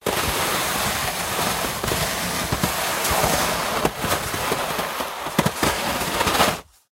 Snowy Pushing
moving, pushing, push, snow